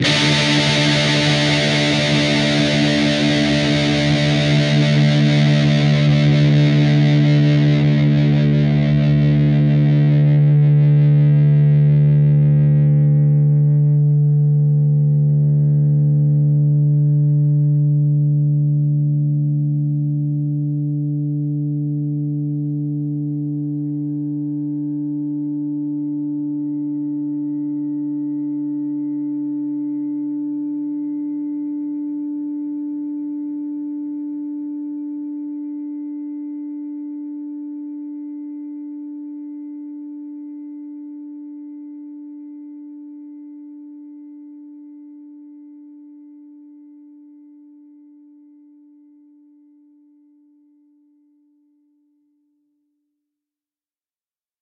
Dist Chr D5th up
Standard D 5th chord. A (5th) string 5th fret, D (4th) string 7th fret, G (3rd) string, 7th fret. Up strum.